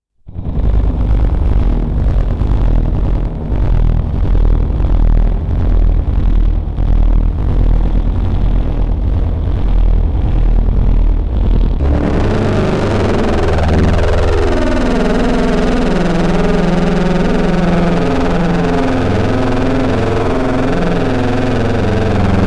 Brainstorming.
Creative Audigy Wave Studio 7
Human voices mixed with engine noise
feelings, mood, sense